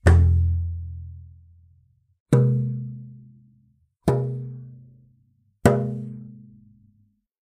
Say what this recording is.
empty bottle one-shots
Me playing on a big plastic bottle for water coolers with hands, single hits.
Recorded by Sony Xperia C5305.
percs, percussion, bottle, plastic